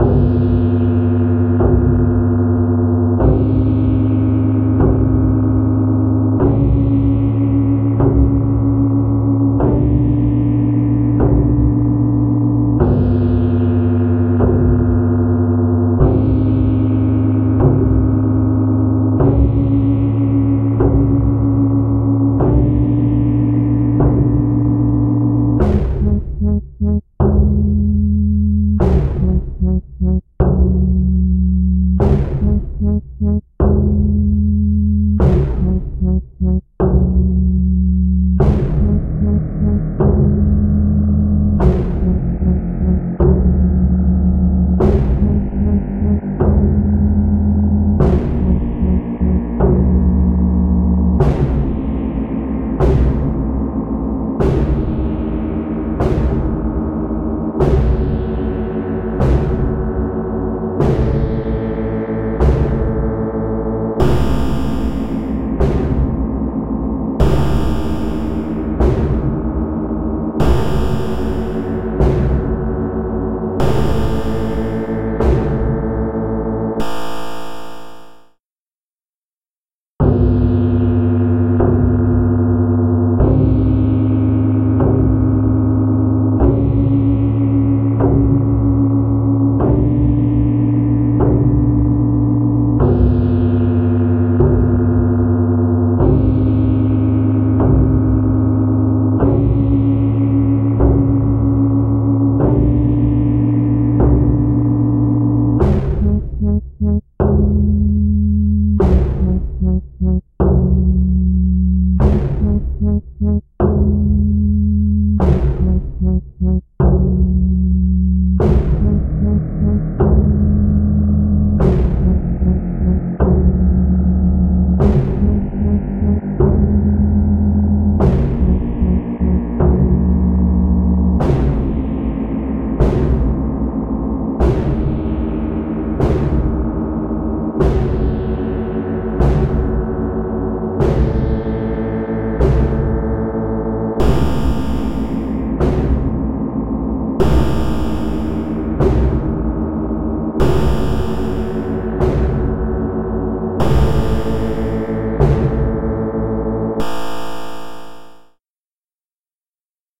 Atmospheric industrial loop #2
You can use this loop for any of your needs. Enjoy. Created in JummBox/BeepBox.